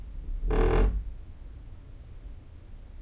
Door groan
Recently the living room door developed a squeak. The funny thing is that it does not do it all the time, so it was actually quite difficult to record.
Recorded with a Zoom H1. Edited in Audacity 1.2.6.
close, creak, door, hinge, squeak